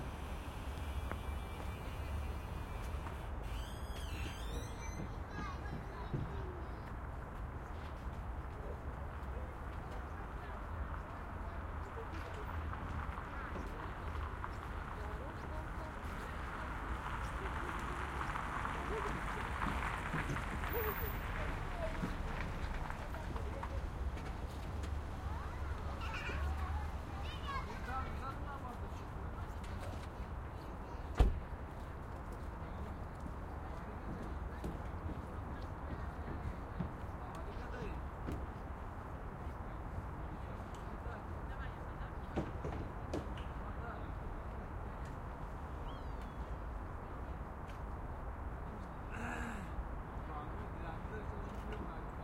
kindergarden evening
Kindergarden at the evening. Kids play. Some traffic in the background. Metal gate squeak. Car arrives. Russian voices
Recorded with pair of DPA4060 and SD MixPre-D in pseudo-binaural array
evening, kindergarden, moscow, winter, russia, cars, children, traffic